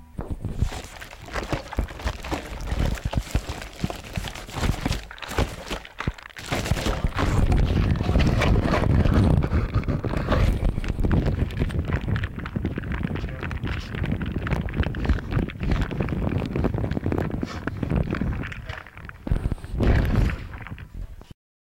I recorded this sound back in 2002. This was recorded putting a mic up to and into the plastic syrup bag used for soda.
blood, goo, gore, gross, jelly, ooz, under, water